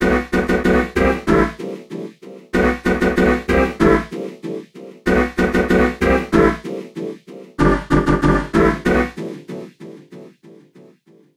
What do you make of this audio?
hip hop19 95PBM
part, hip-hop